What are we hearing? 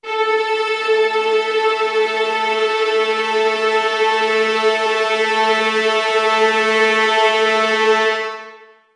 Spook Orchestra A3
Spook Orchestra [Instrument]